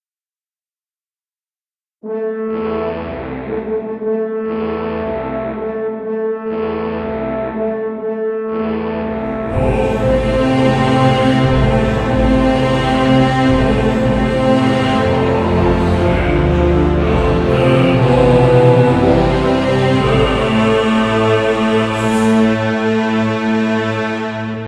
Dreamerion - Epic Hymn
Home recording in 2011 in Poland. All voices recorded by me in Cakewalk Sonar. Used M-audio producer microphone.
choir, chorale, dark, epic, music